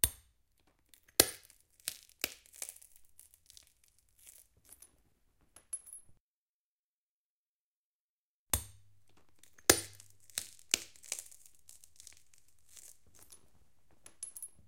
Crunching glass shards 2
Crushing and crunching glass by foot, well... Shoe.
Tiny glass particles colliding when stepped on, crunching them. Then lifting shoe, a tiny shard falls off and bounces.
Recorded with:
Zoom H4n op 120° XY Stereo setup
Octava MK-012 ORTF Stereo setup
The recordings are in this order.